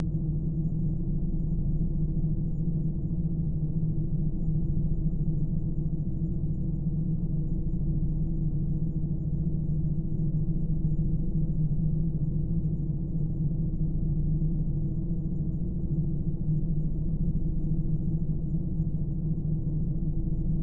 Spacecraft cabin ambient noise 4
A collection of Science Fiction sounds that reflect some of the common areas and periods of the genre. I hope you like these as much as I enjoyed experimenting with them.
Alien, Electronic, Futuristic, Machines, Mechanical, Noise, Sci-fi, Space, Spacecraft